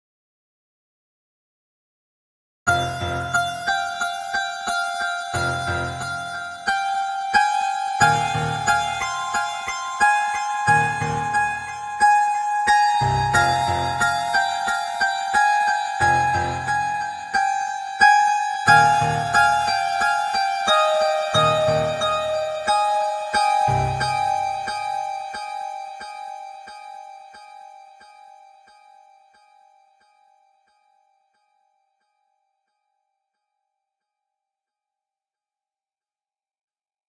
Piano & Synth from DAW message for additional services